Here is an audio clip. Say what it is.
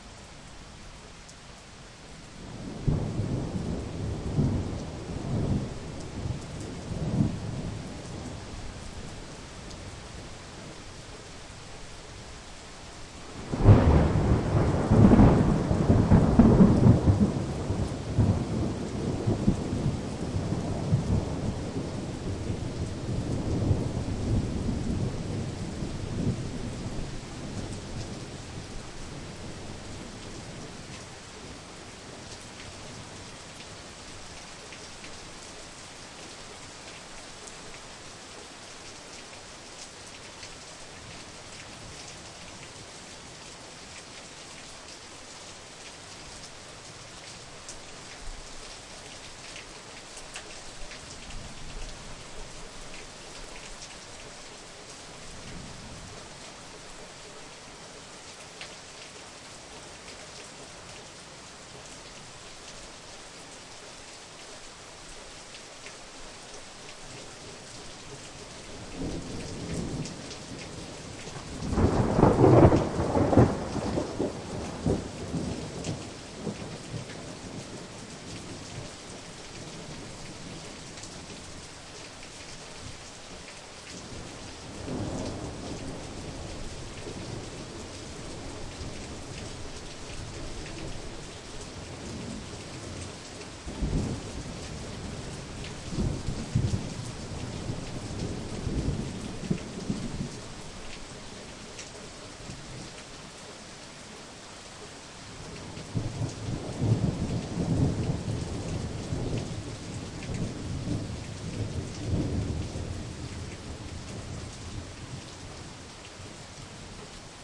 thunder storm recorded with a zoom h2 from a back porch
thunder, storm